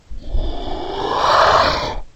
dragon roar distressed 9
Dragon sound created for a production of Shrek. Recorded and distorted the voice of the actress playing the dragon using Audacity.
beast, distressed